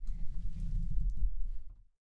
puerta abriendose lentamente friccionando contra el suelo